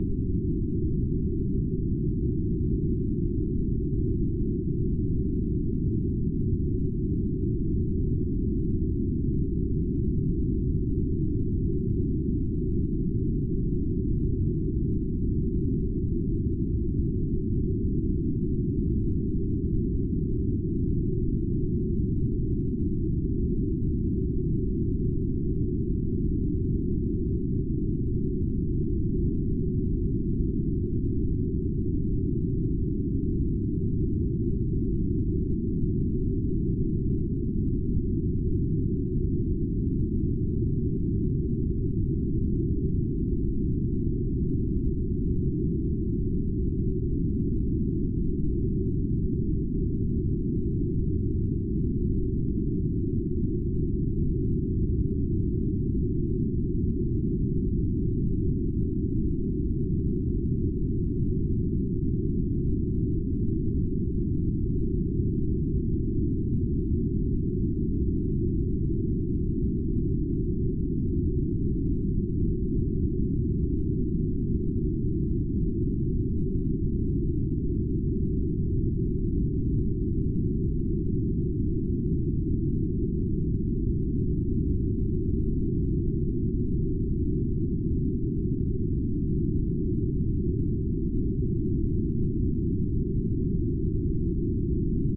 Atmospheric "hyperspace" vacuum sound effect. Or the interior of your spaceship?
analog, atmospheric, lofi, telecommunication, transmitter